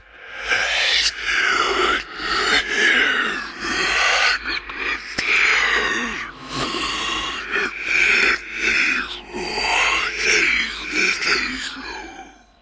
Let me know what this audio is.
Demon Ghost Speaking 1
Recording of me speaking gibberish that has been highly altered to sound like a demon "speaking".
demon, devil, evil, ghost, ghostly, gibberish, language, nightmare, paranormal, sinister, snarl, snarling, speak, speaking, spectre, words